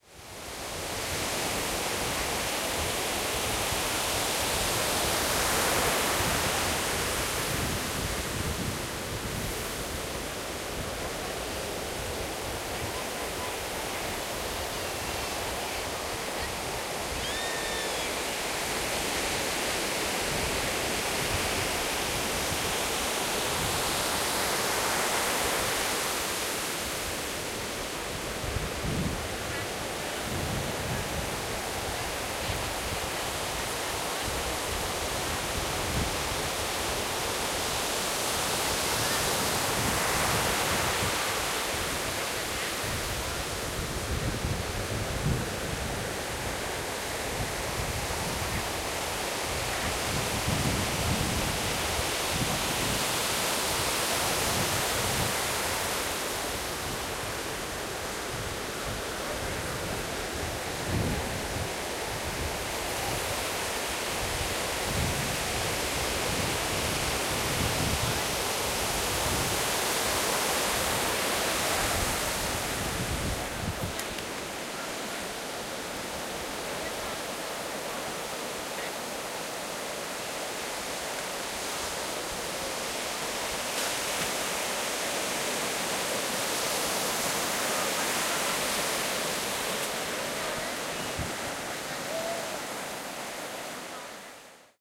Waves - Beach sounds
Daytime scene at Pacific Beach in California. Waves predominate, but occasional chatter can be heard in background. Recorded September 8, 2016 with ZOOM iQ5 mic on iPhone.